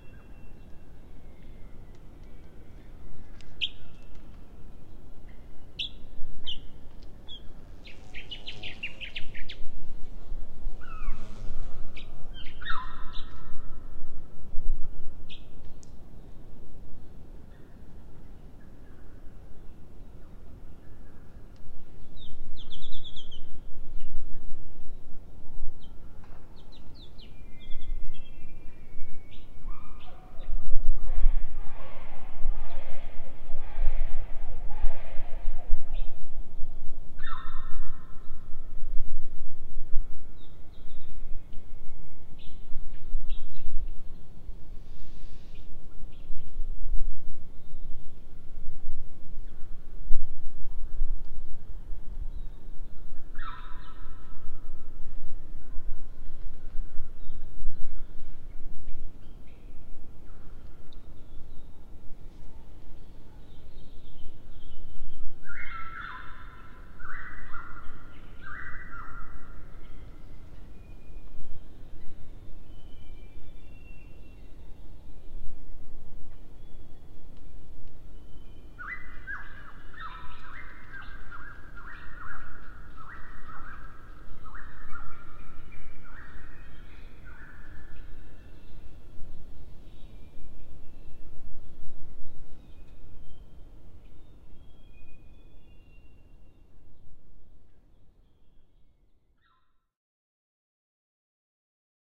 Victoria Rainforest
Mono recording of rainforest ambiance in Victoria/Australia. You can hear birds, a bee flying by closely and the distant ocean. Recorded in the rainforest close to Shelly Beach near Apollo Bay. Recorded with a Sennheiser SE 64 microphone and Fostex FR-2 field recorder. My first field recording.
australia, birds, distant-ocean, field-recording, rainforest